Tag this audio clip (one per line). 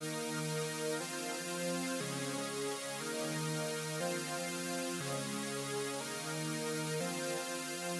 120-bpm
synth-loop
syth